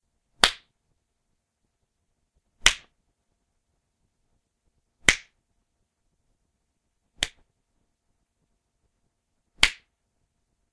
Some slaps I made. Don't ask how. (ouch my face hurts!)